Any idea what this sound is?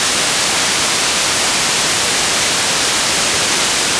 Moog Minimoog White Noise
Just plain noise. Use this to modulate analog gear or similar.